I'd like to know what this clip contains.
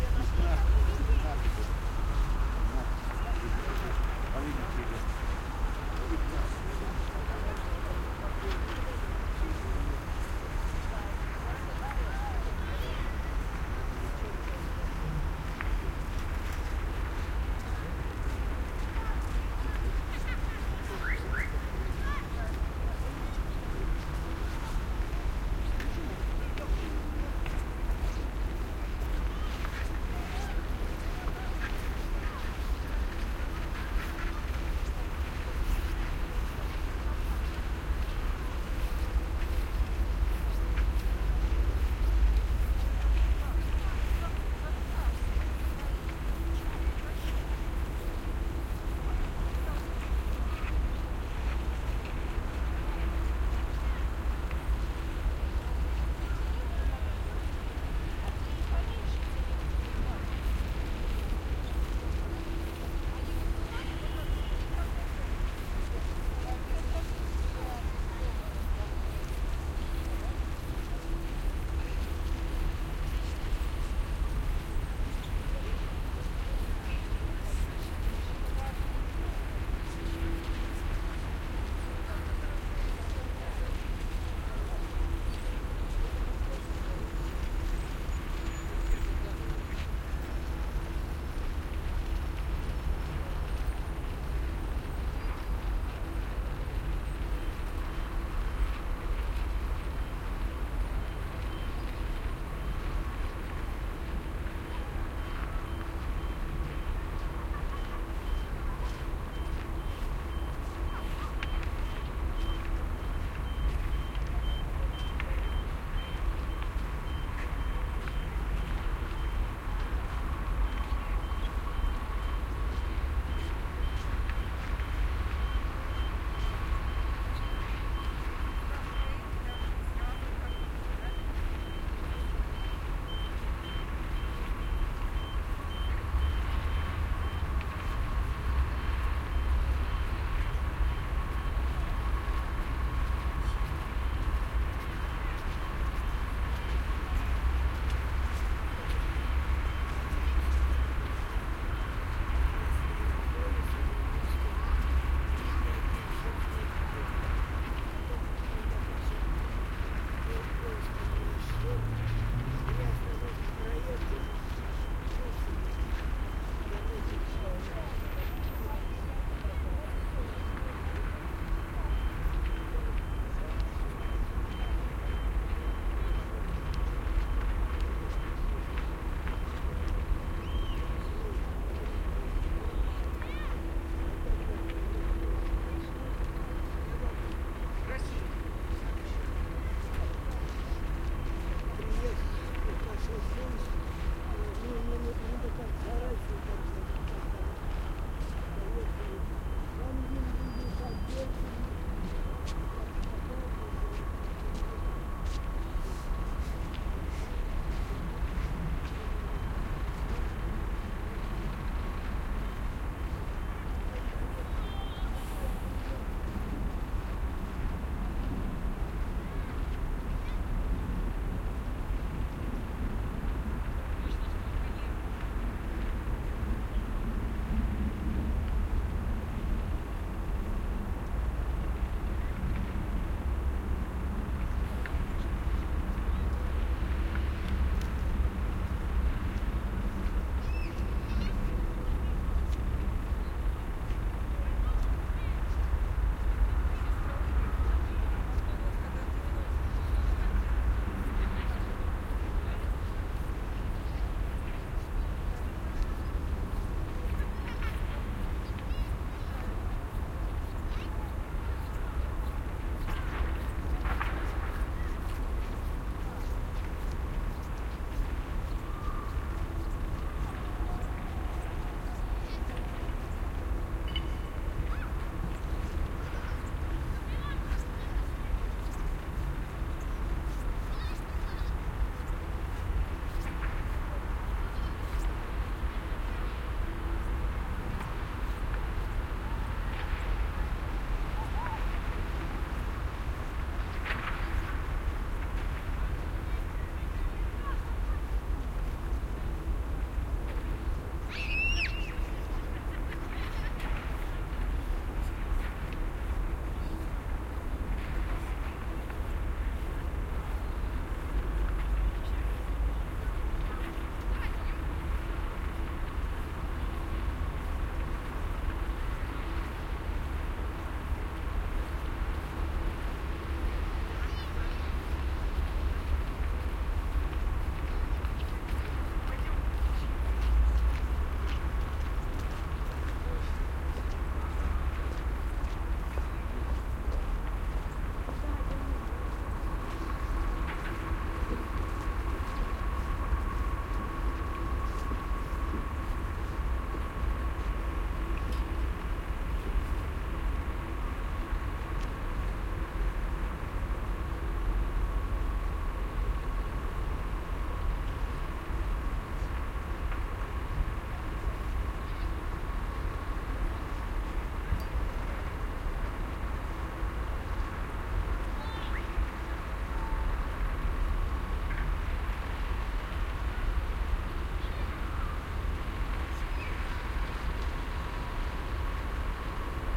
wide winter street with some children and garbage truck
Wide street at winter evening. Snow footsteps, children, crowd walking and talking russian. Garbage truck arriving at the background.
Recorded with pair of DPA4060 and Tascam DR-100 MKII in pseudo-binaural array